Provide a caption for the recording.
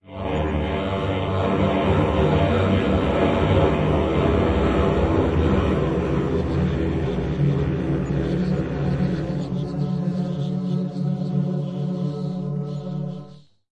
Multi Creature Voice
A group of voices, it is not clear what they want. Probably something for the greater good... of evil! Own voice recording and Granular Scatter Processor.
Recorded with a Zoom H2. Edited with Audacity.
Plaintext:
HTML:
sci-fi, horror, rpg, transition, voices, video-game, fantasy, action, ghosts, game-design, feedback, role-playing-game, game-sound, scary, adventure, dark, creepy